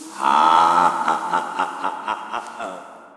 GORI AURELIEN 2015 2016 evillaught
Theme: evil laught
this sound was recorded from a laught and mixed to look like an evil laught. The laught is my own. I mixed it with some audacity effects.
Methodology: J'ai enregistré mon propre rire. Afin de le rendre "étrange" j'ai inversé le sens du son. ensuite j'ai inversé le sens du son pour que le rire soit moins naturel. Un effet de "reverbation" créer un rendu proche de celui d'un rire démoniaque. Pour finir le son, un fondu pour rendre la fin moins brute.
Masse: son unique
Timbre harmonique:rire inversé, similaire à un rire démoniaque
grain: son avec des notes aiguës et grave
allure: son relativement fort
dynamique: le son se termine en fondu de manière douce et progressive
profil melodique: son stable, cependant ce rire créer un effet dérangeant
profil de masse:son variable. la première tonalité est plus appuyée et plus lente. le son de la fin est plus brute que l'ensemble.
psychedelic, evil, laught, scary